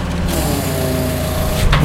JCB Bucket Rotating 1
Buzz, electric, engine, Factory, high, Industrial, low, machine, Machinery, Mechanical, medium, motor, Rev